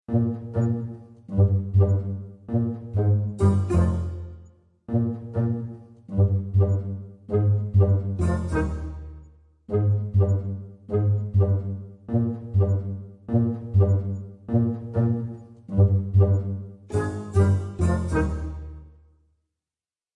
Enjoy the use of our sound effects in your own projects! Be creative and make a great project!
guns, Rockets